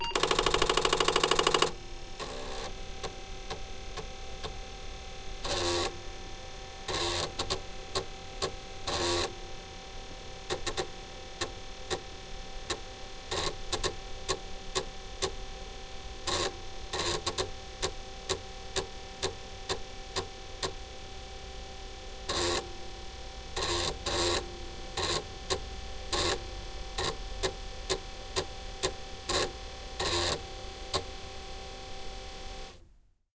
Apple IIe Computer Boot and Load a ProDOS 5.25" Disk
This is an Apple IIe computer booting and loading a ProDOS System 5.25" disk on a DuoDrive disk system. Recorded with a Zoom H4N.